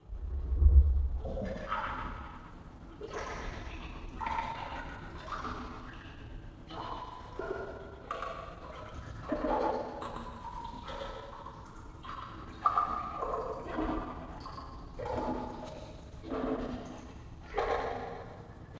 Fingers of death swirl water in a glass bowl in the sink of horrors.
splash, fake